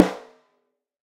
CHEAP NASTY SNARE 02
These are samples of the horrible cheap 1950s 14x4" snare drum that I bought off a garage sale for $5 bucks. I was phase-checking some Lawson L251 mics with M7 capsules when I captured these samples. The preamp was NPNG and all sources were recorded flat into Pro Tools via Frontier Design Group converters. Samples were processed in Cool Edit Pro.
drum, ring, sd, snare